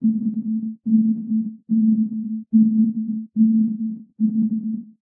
A few octaves in A of a sound created with an image synth program called coagula.